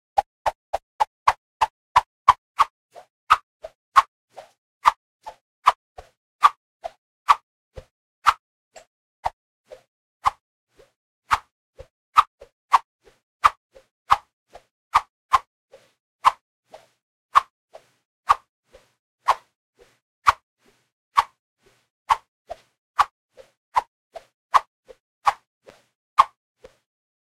Quick Whoosh 1
Sounds like somebody is ready to fight with their mighty combat fists!
(Recorded on Zoom H1. Mixed in Cakewalk by Bandlab)